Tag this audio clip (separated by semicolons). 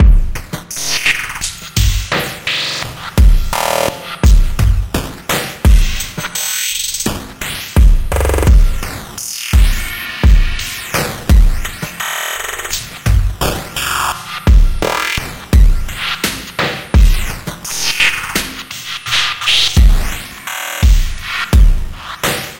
percussion-loop; loop; rhythm; percussion; groovy; drum-loop; drums; electronic; glitch; beat; drum